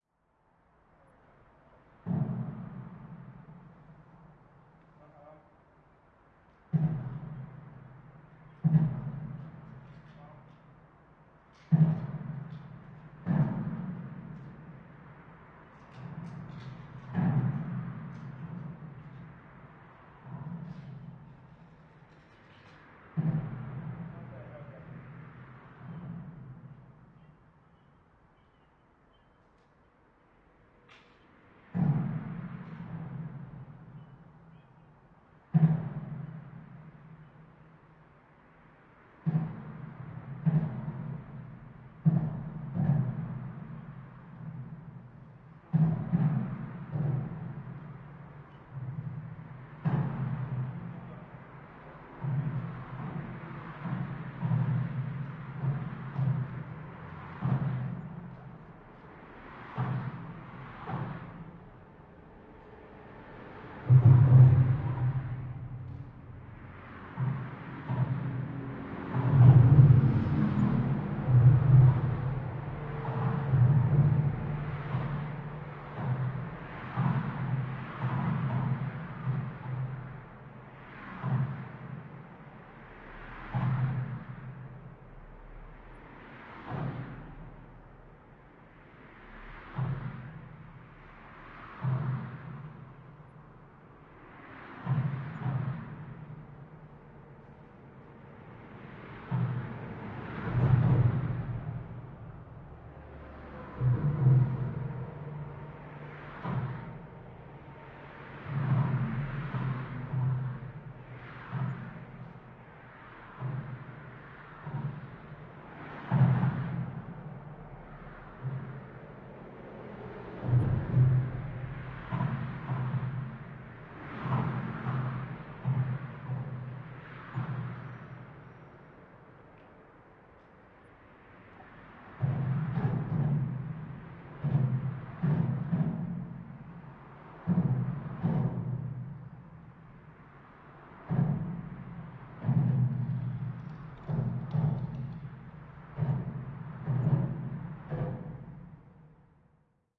05.05.2013: under the bridge ambience. Captured under Ballensteadt bridge in Poznan. Sound of passing by cars and tucks